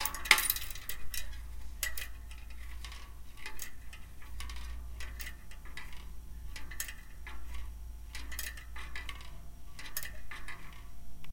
hanging swaying spring.